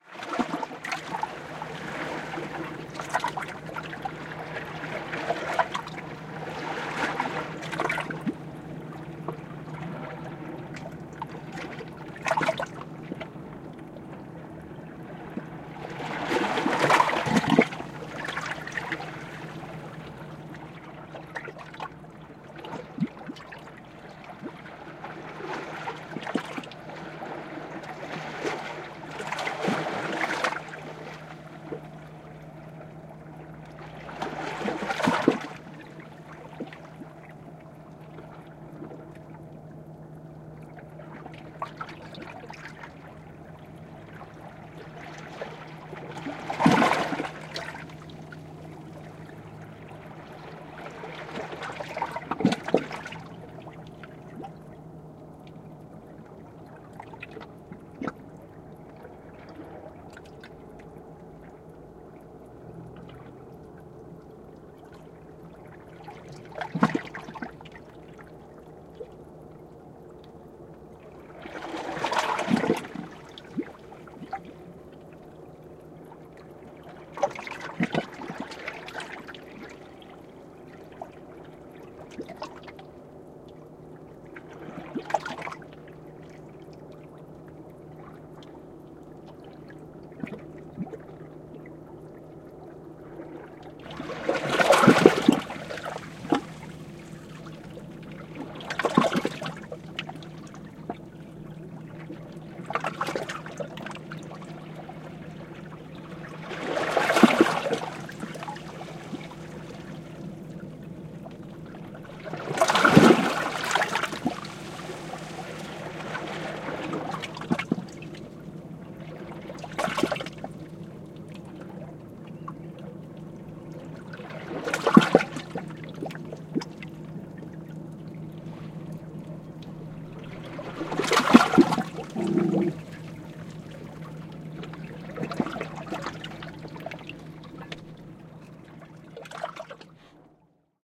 05.05.2016: 20.00 p.m. Sound recorded in Dubrovnik (Croatia) on the Lapad beach. Sound of the Adriatic waves between rocks. Sound background: motor boat engine. No processing (recorder: marantz pmd620 mkii + shure vp88).